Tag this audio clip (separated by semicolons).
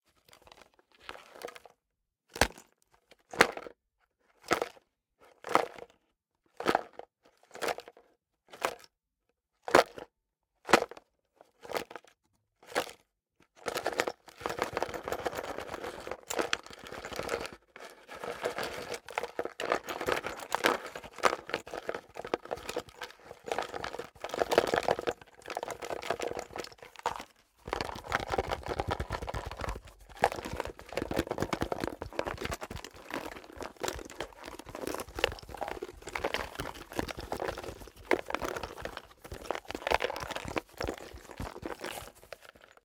rummage; clatter